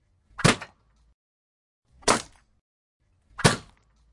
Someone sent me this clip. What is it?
Pistol Whip
Several sounds combined to approximate the sound of someone getting smacked with the butt of a pistol. Whip crack with a metallic clank and a wet crack as it hits bone/flesh.
Simulated by layering a whip crack, a punch, a metal clatter, and a squish made from stirring pasta in.
skull, impact, whip, smack, pistol, gore, crack, weapon, hit, gun, violence, blood, attack